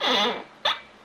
A squeeky old, thrift-store office chair. Comfy, but loud. Recorded on a Sony IC voice recorder and filtered for hissing. A single rising squeel or squeek.
lofi, groaning, squeel, chair, squeeky, squeek, groan, effect